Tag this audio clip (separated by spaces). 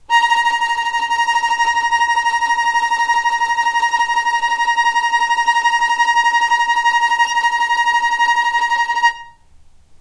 tremolo violin